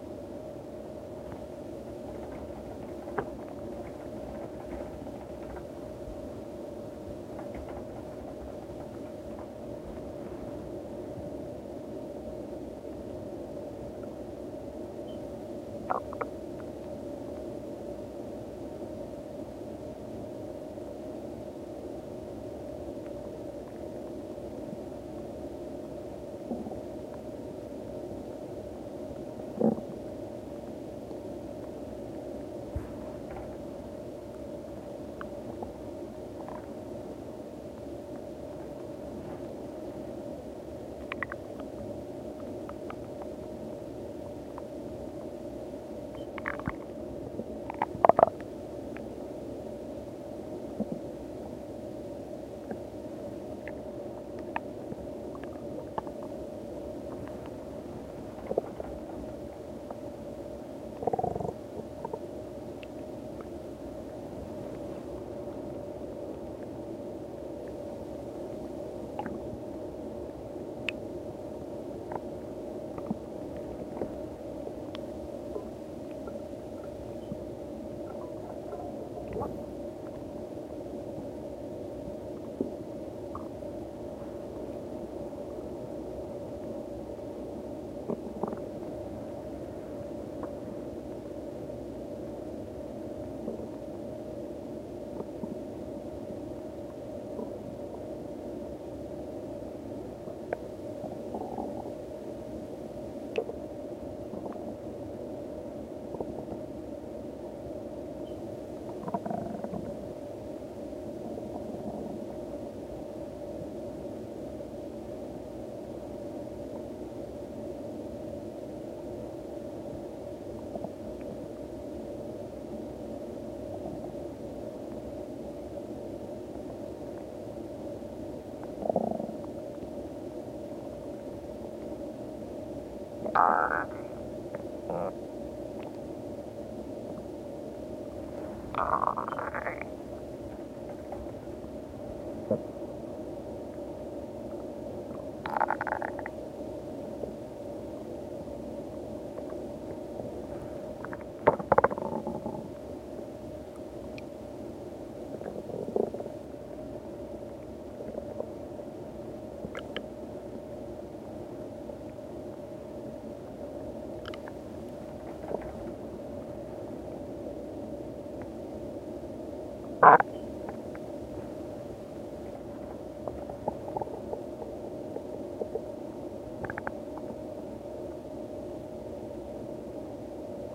Some quiet gurgling/bubbling/other words here. How do I description please help. Not sure why this one is so noisy.